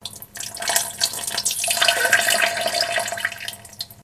A sound effect of a somebody peeing in a toilet